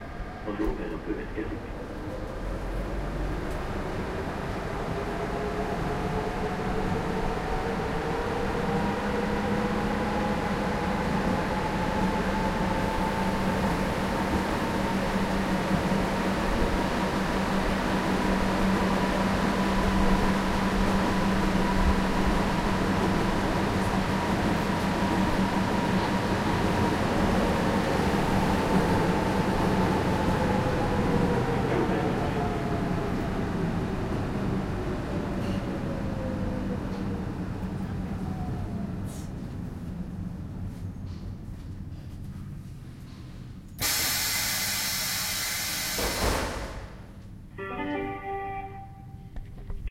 Budapest Metro M1
H1 Zoom. M1 metro in Budapest - 2nd oldest underground in the world rickety old train on old line underground
Metro, Ride, Subway, Train, Trains, Transport, Underground